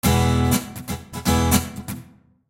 Rhythmguitar Emaj P109
Pure rhythmguitar acid-loop at 120 BPM
acid, 120-bpm, rhythm, loop, guitar, rhythmguitar